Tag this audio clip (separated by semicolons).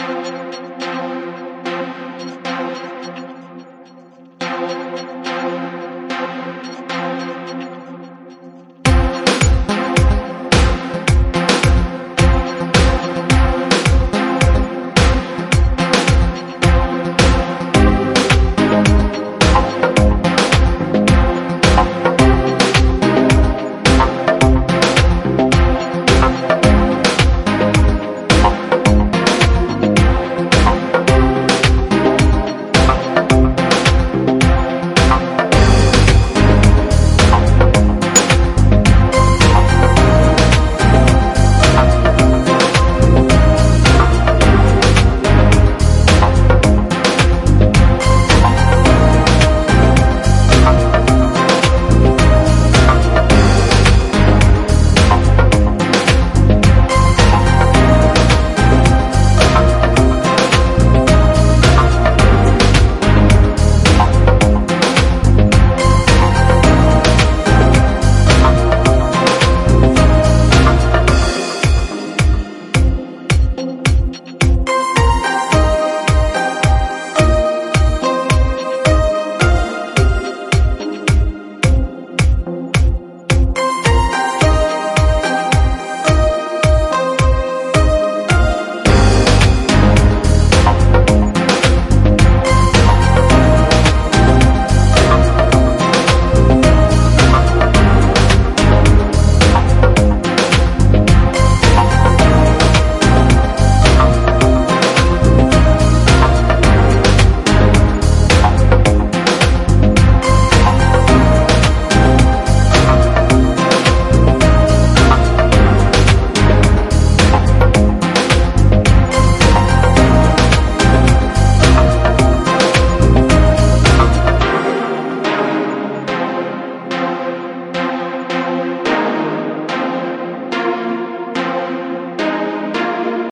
computers; music; future; sciencefiction; neuroscience; industry; sience; metalurgy